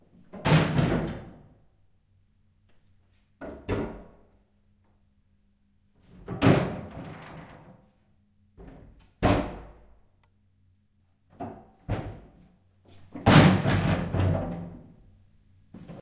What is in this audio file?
Trash can falling over - multiple times - Mülltonne umkippen
A trash falling over, 3 versions. I couldn't find a good sound online so I made one myself in my basement with my Zoom H4n.
drop, can, lltonne, falling, M, Trash, over, umkippen